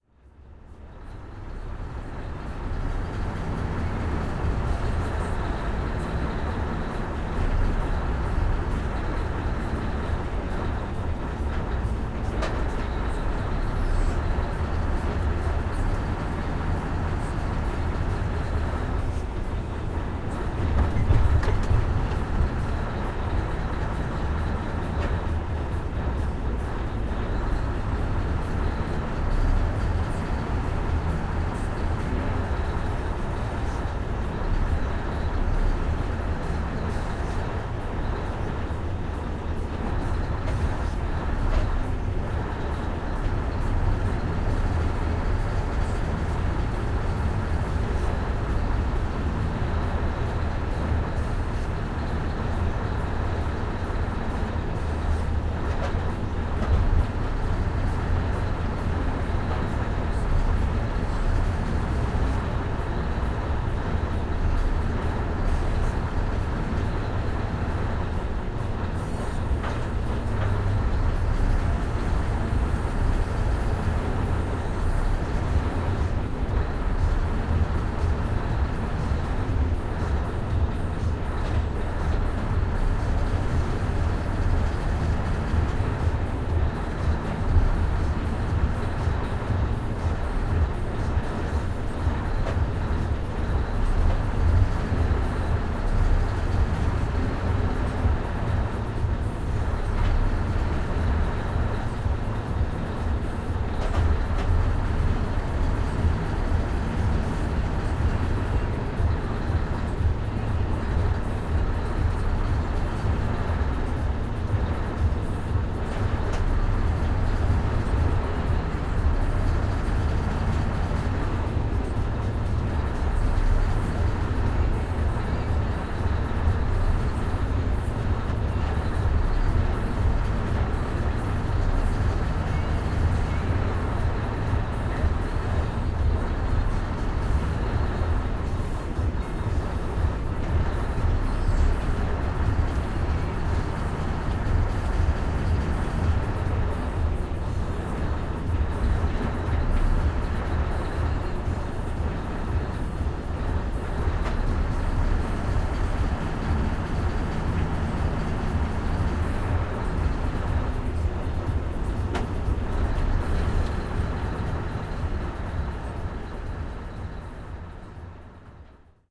06082015 załadunek zrzynka
06.08.2015: loading so called zrzynka (wooden remains). Noise of the HDS crane. Recorder zoom h1.